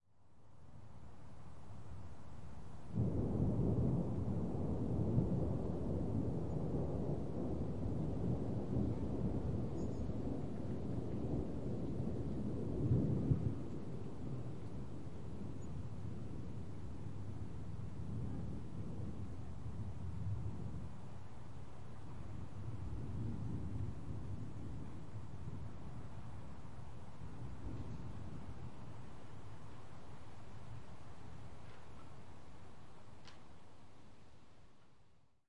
The sound of a distant thunderstorm. Please write in the comments where you used this sound. Thanks!